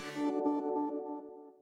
magic burst
made using synths, reverbs, and delays.
reverb, synth, wizard, wand, Magic, sparkles